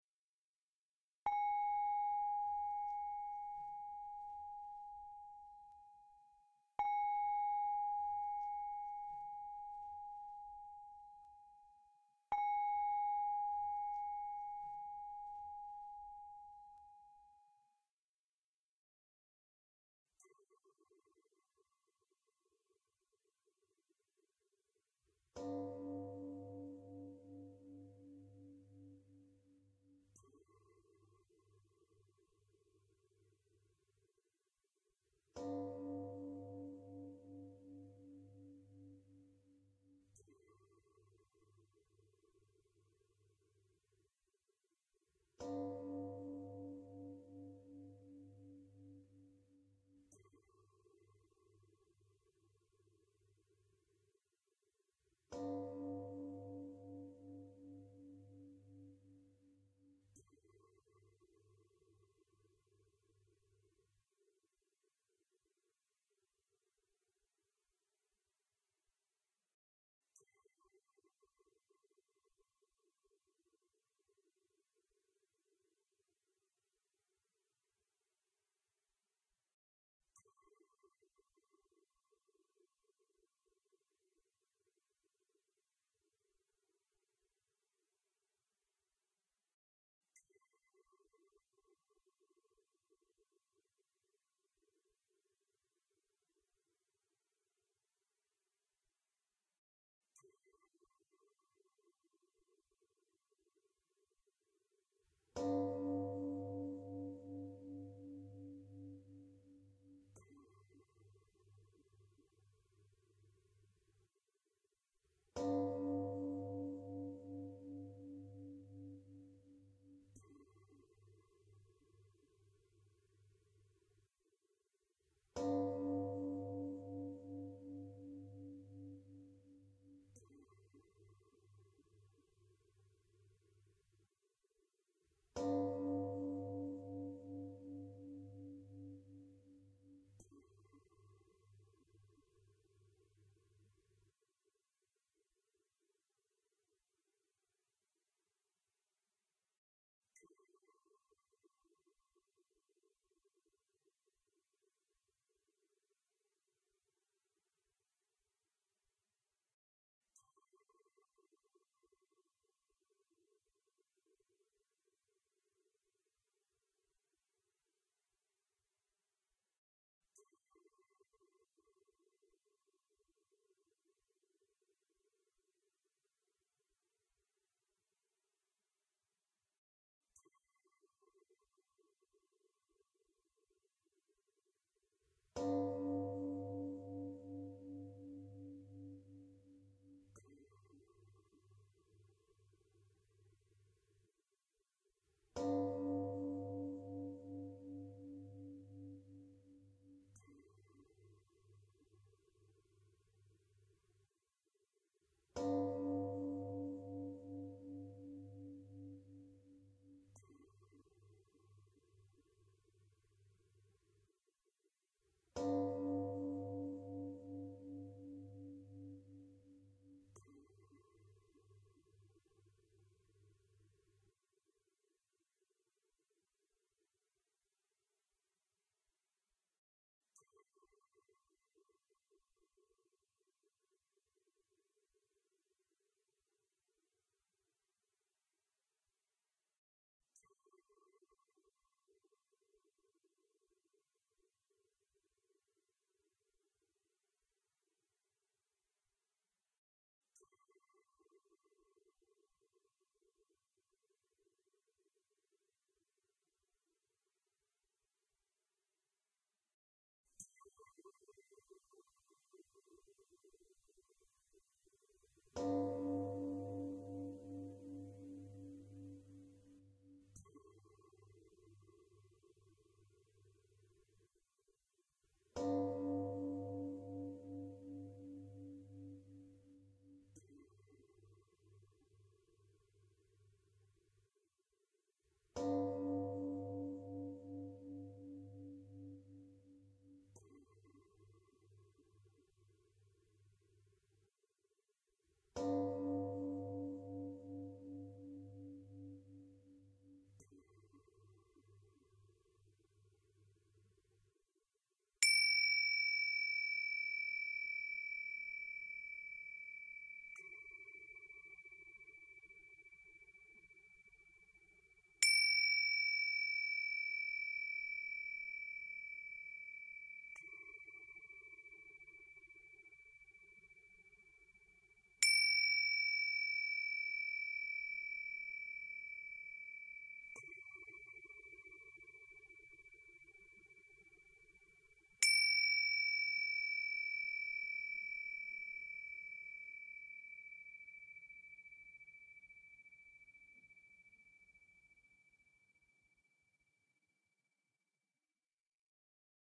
two singing bowl mix